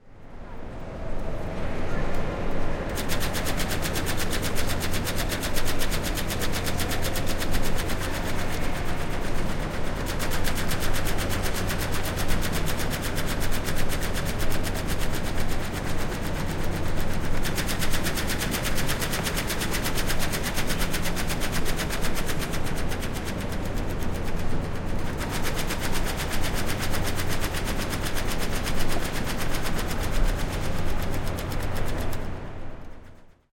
Mechanical alphanumeric flapboard signs have long been a standard fixtureof airports and train station waiting rooms, but the technology israpidly disappearing in favor of more modern (if soul-less)electronic displays. Also known as "Solari Boards" (most were madein Italy by Solari di Udine), the signs' familiar "clack clack clack" rhythm is sufficient to trigger an almost Pavlovian reflex in seasonedtravelers the world over. This 34 second sample was captured onSeptember 20, 2006 in the main waiting room of Amtrak's Philadelphia, PA(USA) 30th Street rail station as the train status board was being updated.The recording contains considerable ambient room and rush-hour crowd noisein the stereo mix.Equipment used was a pair of MKH-800 microphones in a mid-side arrangement(hyper-cardioid and figure-8) and a Sound Devices 744T digital recorder.This sample consists of the unprocessed (not stereo decoded) mid-side audio (mid mic on the leftchannel and side mic on the right channel).
airport, field-recording, mid-side, sign, solari, train-station, undecoded, unprocessed, waiting-room